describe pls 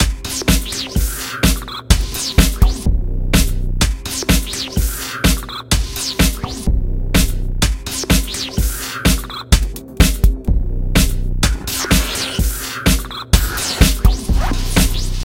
Glitchy noise beat
glitch, noise, loop, game, beat, 126bpm